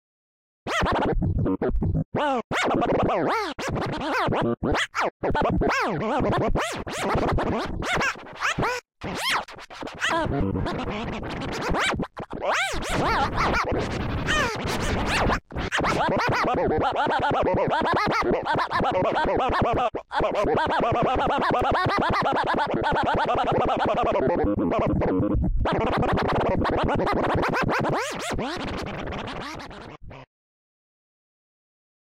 These samples made with AnalogX Scratch freeware.
scratch, synthetic, vinyl